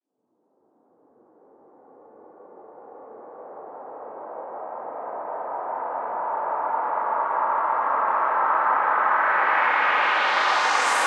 This is a so called "whoosh-effect" which is often used in electronic music. Originally it´s a 6-bars sample at 130
It´s a sample from my sample pack "whoosh sfx", most of these samples are made with synthesizers, others are sounds i recorded.